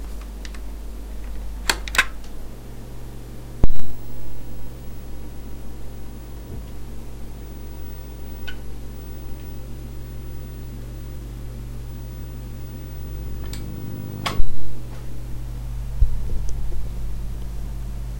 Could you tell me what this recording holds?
TV - Turned on and off
Television turned on and off
Turned, TV, off